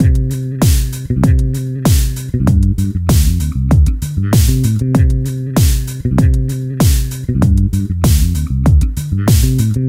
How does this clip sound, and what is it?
PHAT Bass&DrumGroove Dm 20
My “PHATT” Bass&Drum; Grooves
Drums Made with my Roland JDXI, Bass With My Yamaha Bass
Ableton-Bass, Ableton-Loop, Bass, Bass-Groove, Bass-Loop, Bass-Recording, Bass-Sample, Bass-Samples, Beat, Compressor, Drums, Fender-Jazz-Bass, Fender-PBass, Funk, Funk-Bass, Funky-Bass-Loop, Groove, Hip-Hop, Jazz-Bass, jdxi, Logic-Loop, Loop-Bass, New-Bass, Soul, Synth-Bass, Synth-Loop